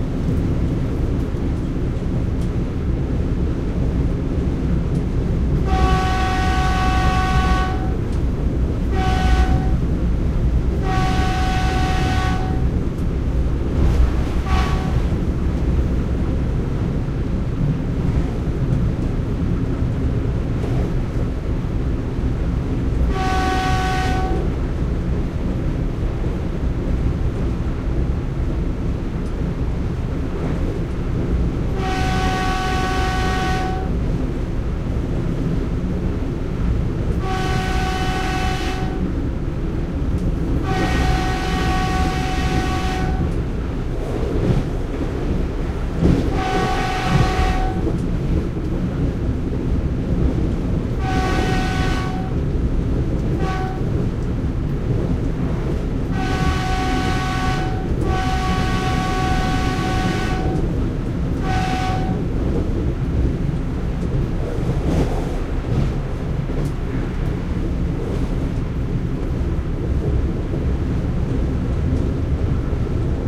Morocco-train
The warning device is very useful when driving a train.
Zoom H2
16 bit / 44.100 kHz (stereo)
Morocco - february 2010